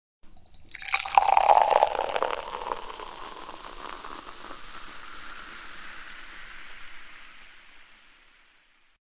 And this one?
Pouring coke
beer, beverage, bottle, can, coca, coke, cola, drink, fill, fizz, fizzy, glass, liquid, pour, pouring, soda, sparkling